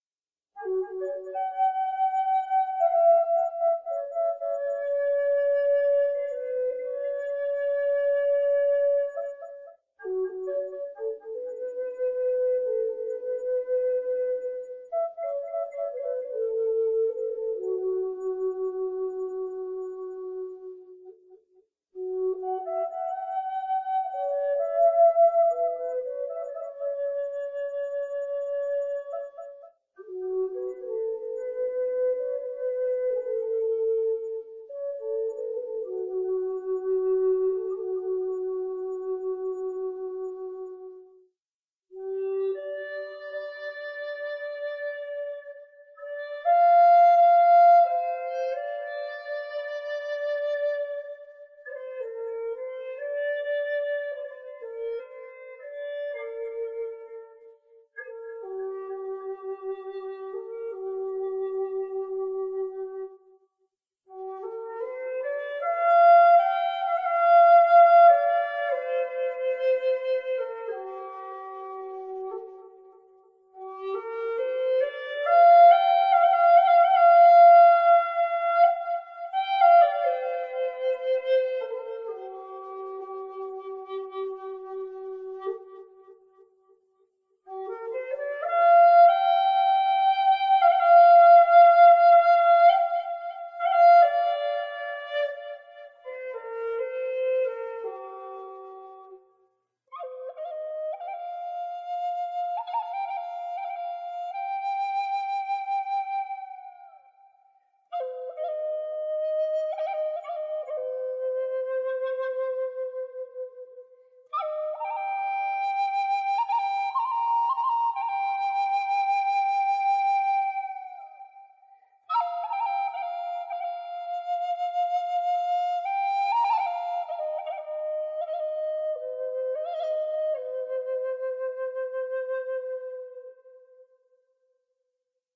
Includes tracks (in order):
ambient, cedar, instrumental, meditation, melody, native, peace, sad, soothing, wind